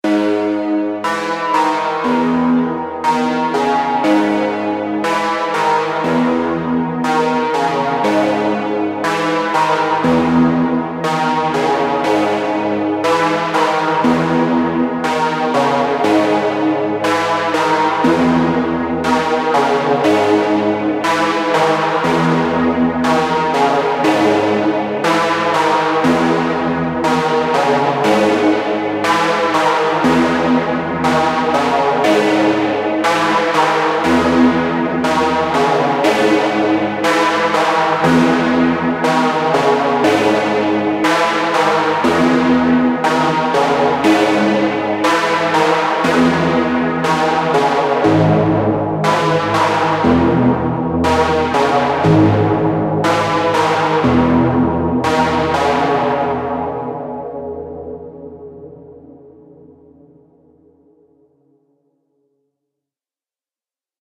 Modular synth loop
Modular synth with looping notes and gradual detuning